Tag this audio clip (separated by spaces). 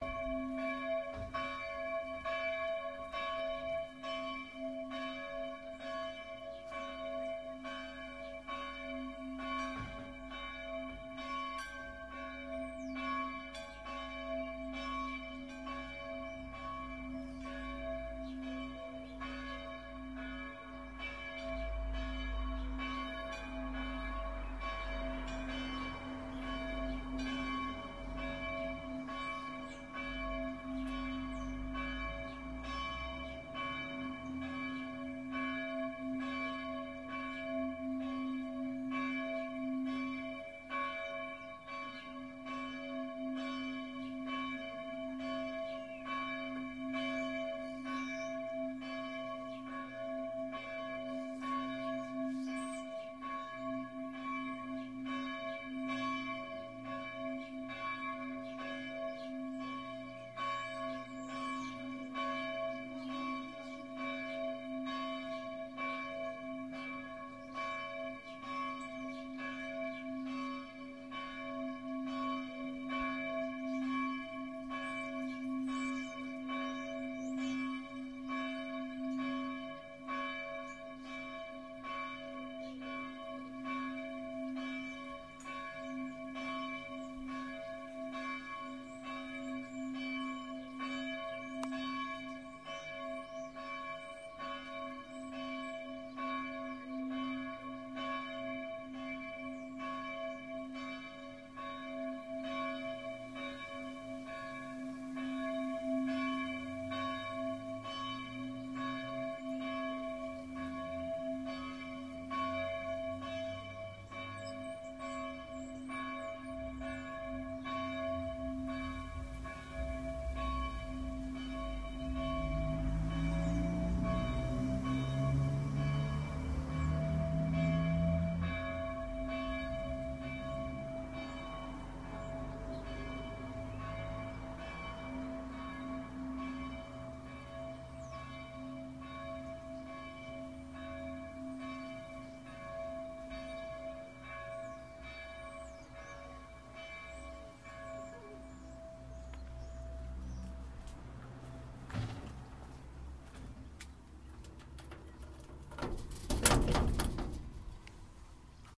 bells church noon